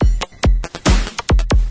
ATTACK loop 140 bpm-17
are all part of the "ATTACK LOOP 6" sample package and belong together
as they are all variations on the same 1 measure 4/4 140 bpm drumloop. The loop has a techno-trance
feel. The first four loops (00 till 03) contain some variations of the
pure drumloop, where 00 is the most minimal and 03 the fullest. All
other variations add other sound effects, some of them being sounds
with a certain pitch, mostly C. These loop are suitable for your trance
and techno productions. They were created using the Waldorf Attack VSTi within Cubase SX. Mastering (EQ, Stereo Enhancer, Multi-Band expand/compress/limit, dither, fades at start and/or end) done within Wavelab.